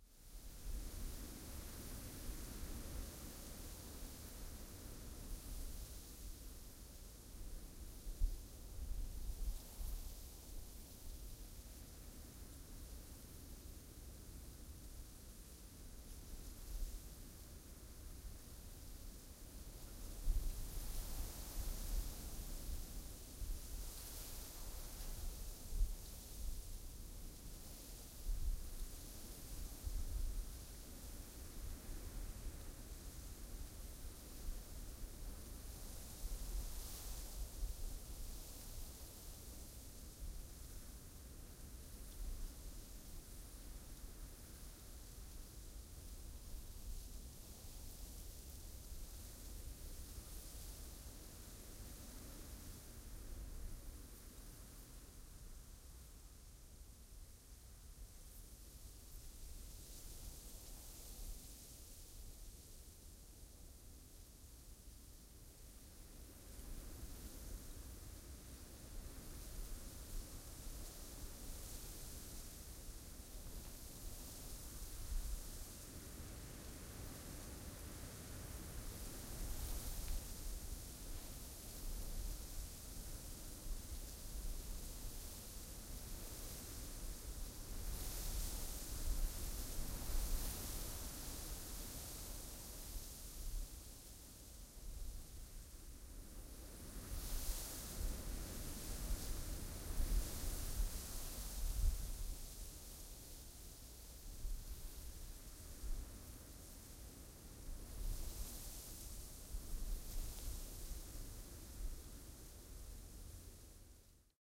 Field ambience 01
Open plough field , wind and noise of dry grass.